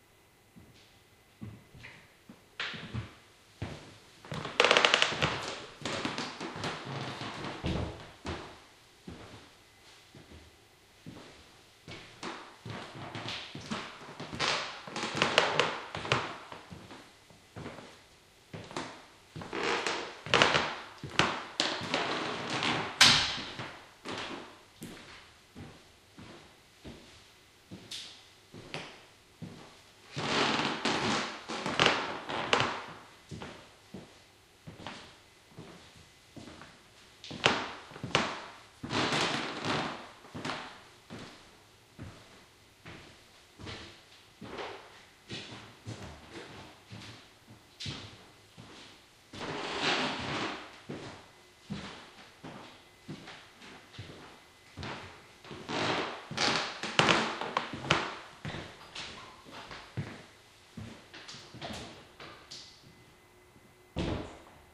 PACING GENTS TOILET
Pacing around a gents toilet with a very creaky floor.
pacing, walking, creaky-floor